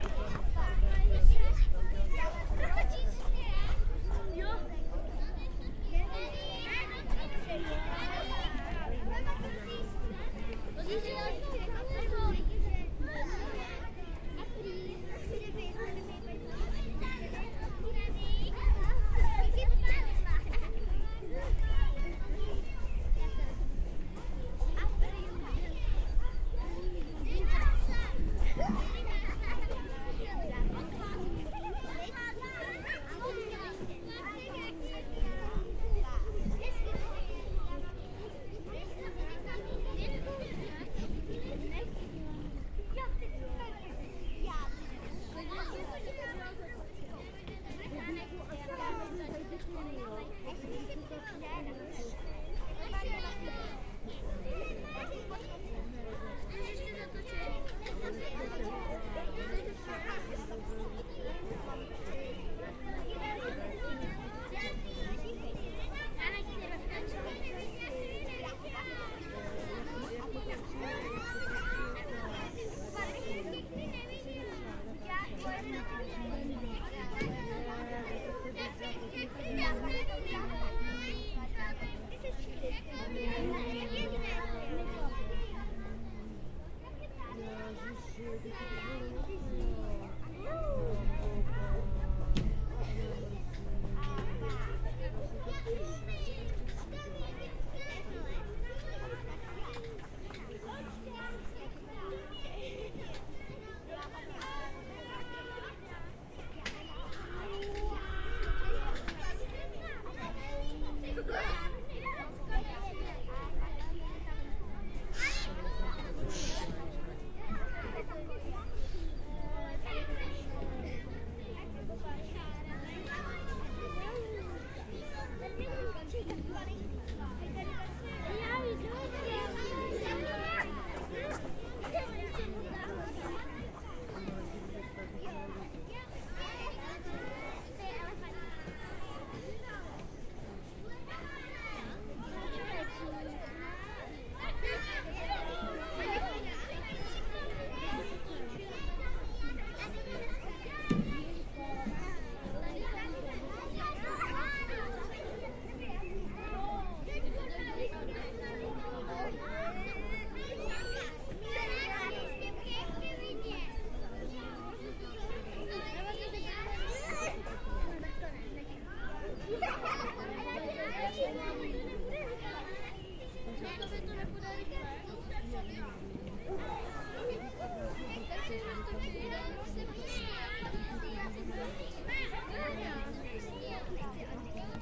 Kids in the playground in the city park beside the river.
Zoom H4
playground, kids, H4, park